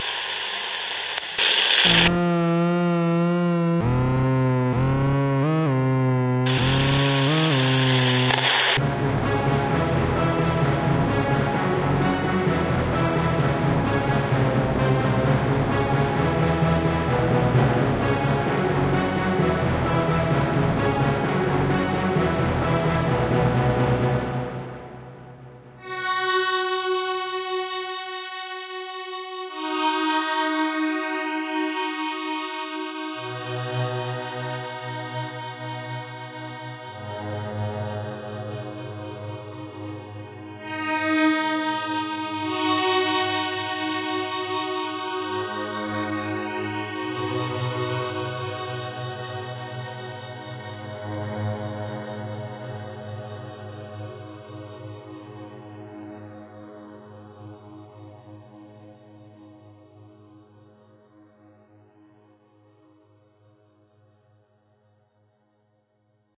as orelhas do donkey kelly
sounds, space, wave, future, radio, SUN, star